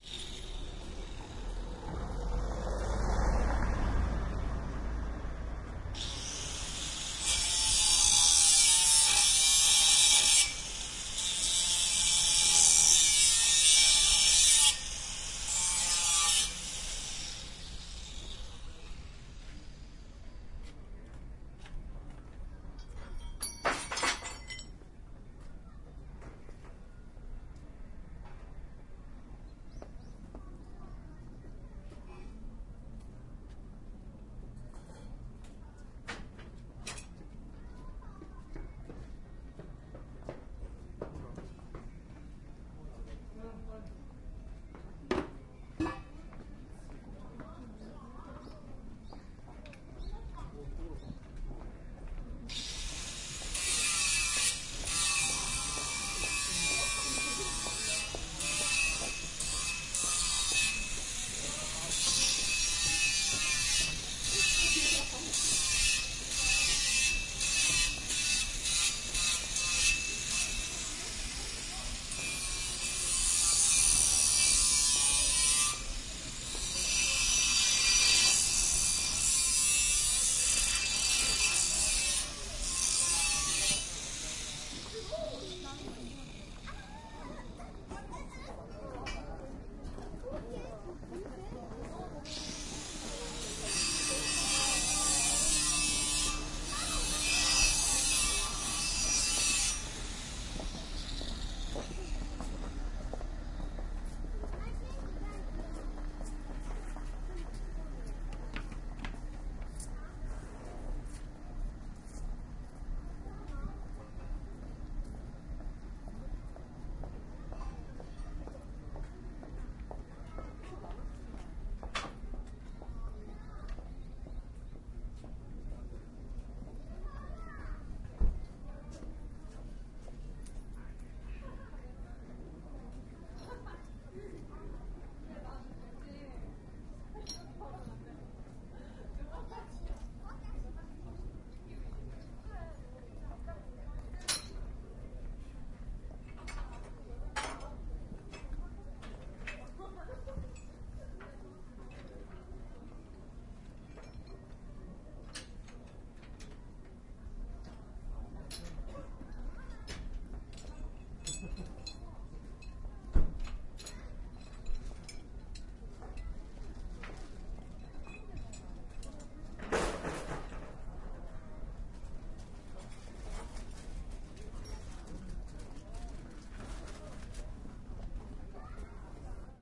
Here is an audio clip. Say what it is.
0109 Angle grinder
Angle grinder and tools. People in the background
20120118
korea, seoul